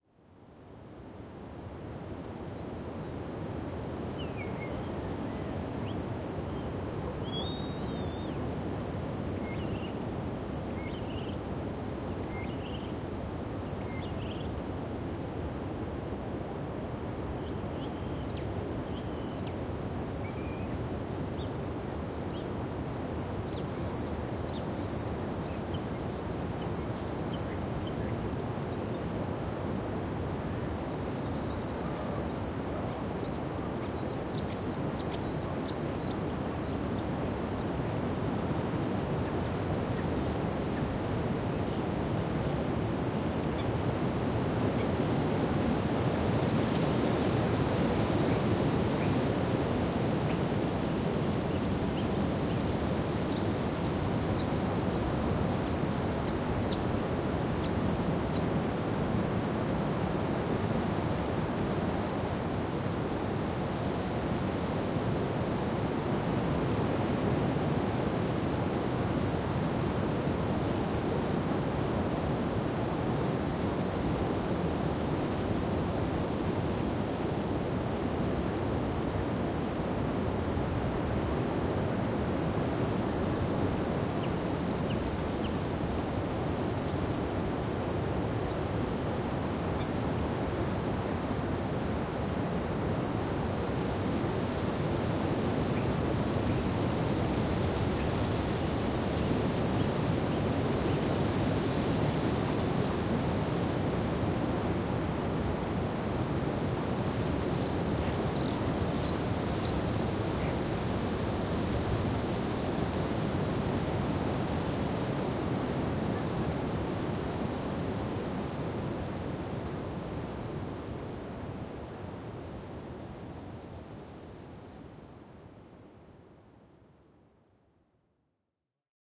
windy birch forest with birds
wind with birds in a birch forest
Mass of wind and details on the young leaves of birch threes.
Some birds through the wind.
recorded in Birkenau, Poland, spring 2007
recorded with Shoeps AB ortf
recorded on Sounddevice 744T
Listen also to» "Wind in birches"
birch, birds, field-recording, forest, leaves, nature, Poland, spring, trees, wind